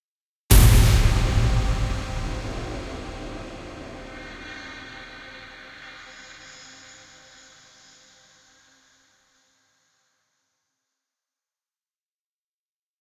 Hit/stab soundeffect for theatre and film. Made using Cubase Pro.
fx, punch, stab